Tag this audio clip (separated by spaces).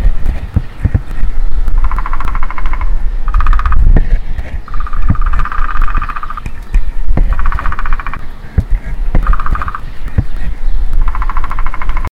birds recordings woodpecker